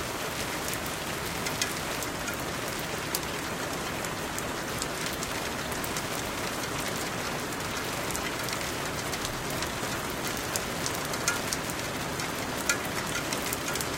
Rain which can be looped, this has been recorder with my Blue Yeti.
heavy, Loop, Rain, HeavyRain, Bad, Loopable, Thunder, Weather